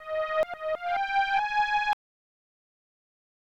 Simple sound made with LMMS. It might be used for an achievement in a game.